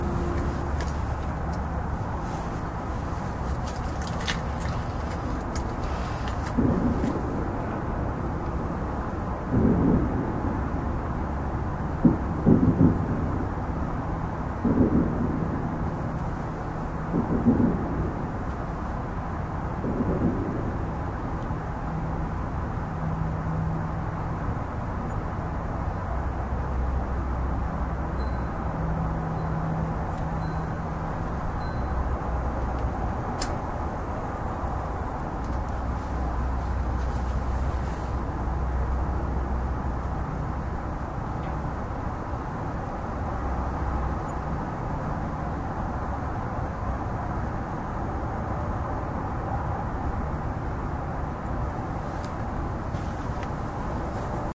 Sounds of distant fireworks and other suburban sounds recorded with a the Olympus DS-40.
ambient, atmosphere, fireworks, outdoor, patio